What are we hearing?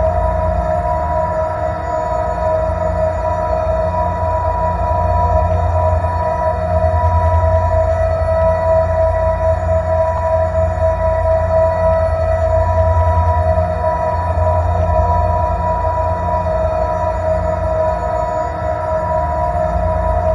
machine ultracore sound 2
An alien spaceship engine, powercore or other versatile alien device sound
power, core, drone